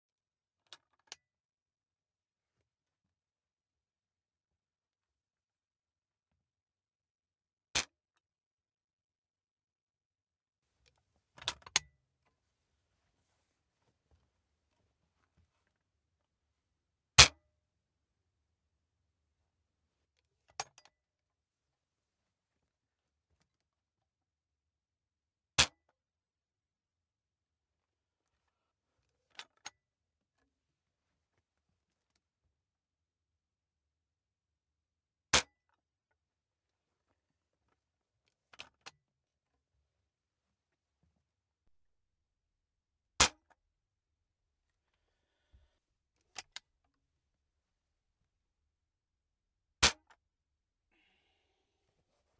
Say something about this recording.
Handmade wooden crossbow as would be authentic to the medieval time period. Cock and Dry Fire, several takes. Unedited original studio recording. Only talking between takes was deleted. AKG C414 > Symetrix 528e > ProTools 96 i/o.